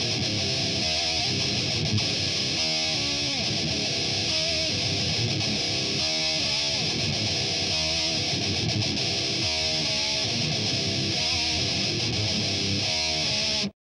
THESE LOOPS ARE 140 BPM AND MAY NEED TO BE SHAVED AT THE BEGINING AND END OF EACH LOOP I LEFT A LITTLE THERE SO YOU CAN ADJUST TO A SLIGHTLY DIFFERENT BPM SOME OF THEM ARE 2 PART LOOPS MEANT TO BE PLAYED SIDE BY SIDE, THEY ARE MONO SO U NEED TO CREATE DUAL CHANNEL FOR STEREO AND TO HAVE BETTER SEPERATION I SUGGEST OFFSET 1/64 HAVE FUN PEACE THE REVEREND
rythum, groove, heavy, rythem, hardcore, metal, loops, rock, guitar, thrash